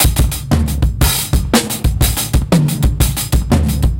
A short drum loop with a distinctive "low-fi" and "raw" feel. Could work well in a drum and bass or hip-hop project. Recorded live with a zoom H2N (line input from a soundboard).
drums, beat, drum-loop, loop, percussion-loop, groove, breakbeat, drum-and-bass, hip-hop, samples